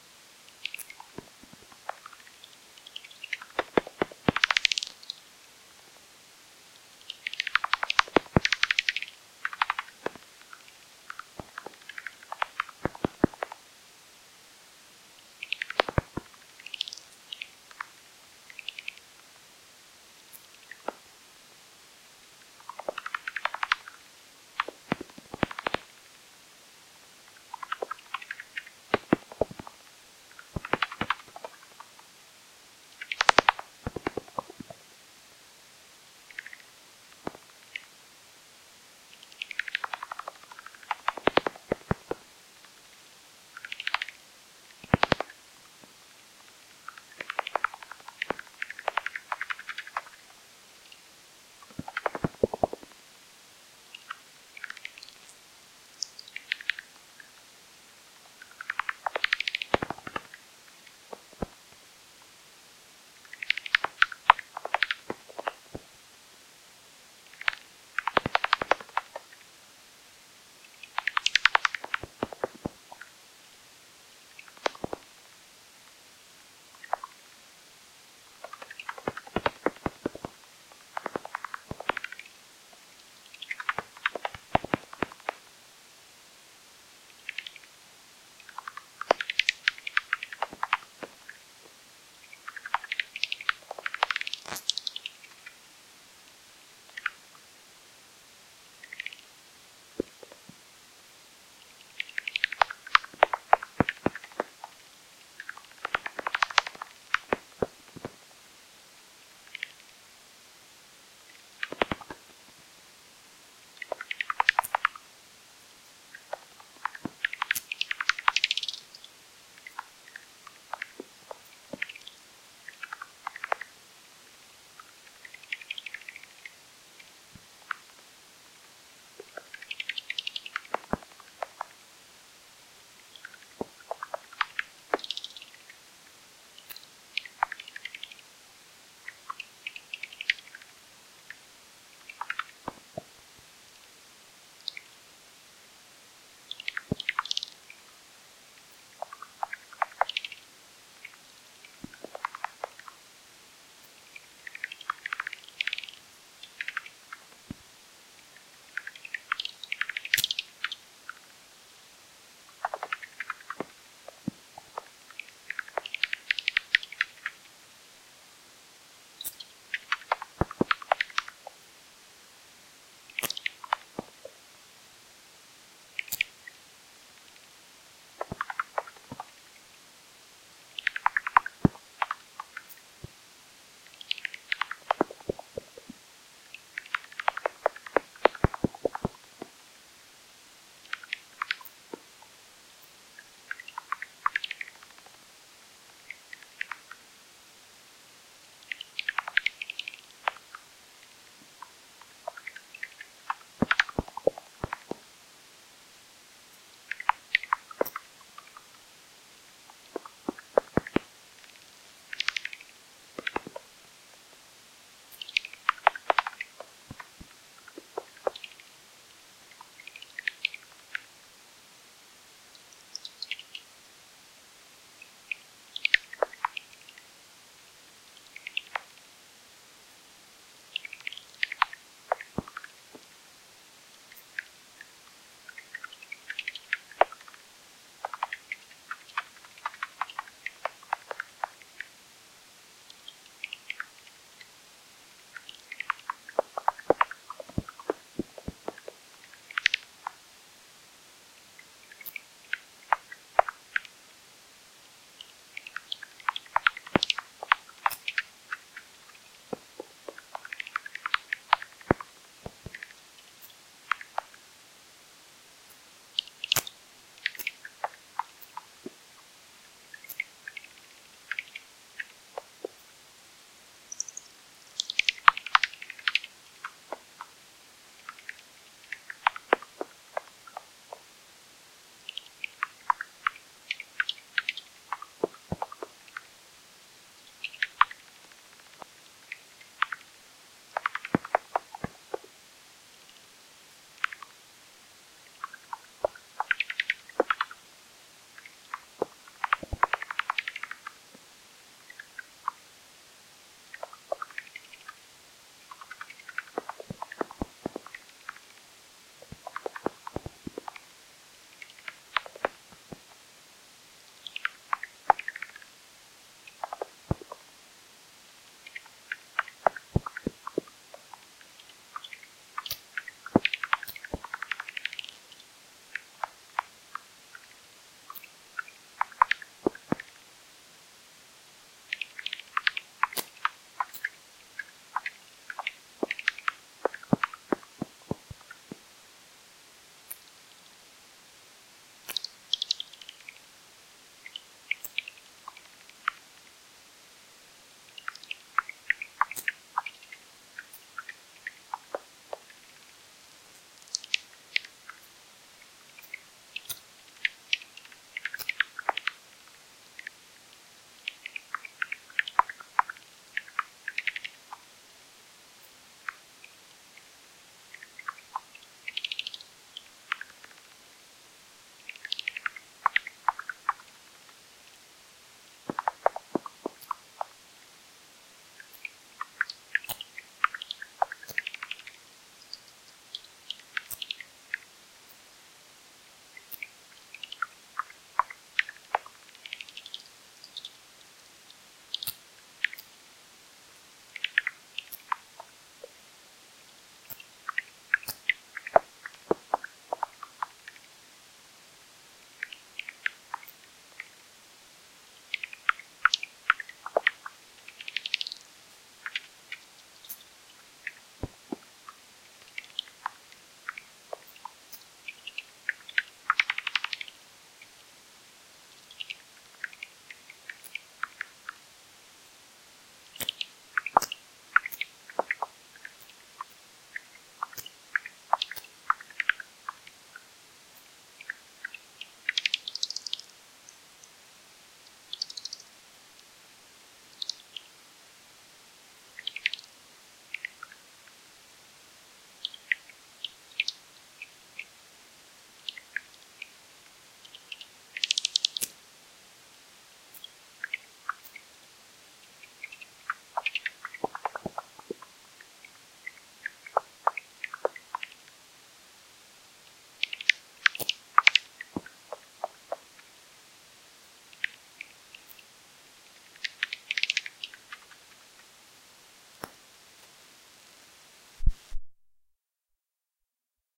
East Finchley Bats 26th July 2018
Silence truncated
Microphone: Magenta Bat5
Recorder: Olympus LS10
Processing: Audacity
Location: East Finchley
Bats, Field, recording, Wildlife